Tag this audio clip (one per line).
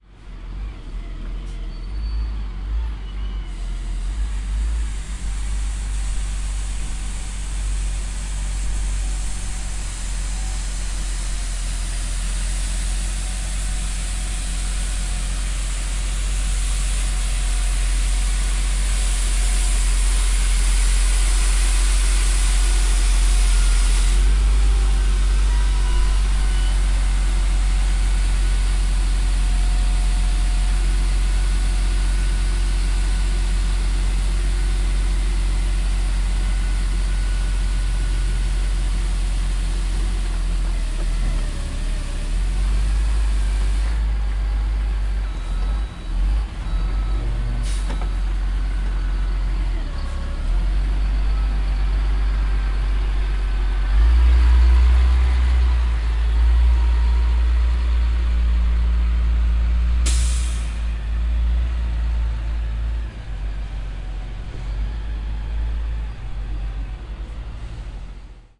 Bear
Broom
Brushes
Cleaner
Street
work
working